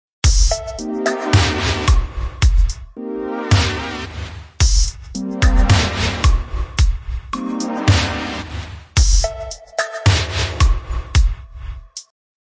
"Cool Classic" Sample
Made with GarageBand.
I hope you enjoyed this sound! If not, no worries. Have a pleasant and safe day.
Beat, Chill, Electronic, Groovy, Loop, Sample, Trippy, Vapor, Vaporwave, Vibe